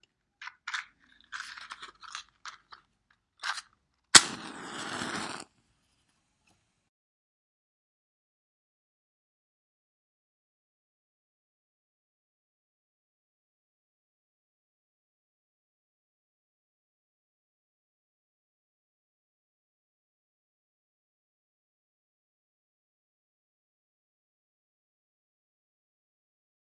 start a fire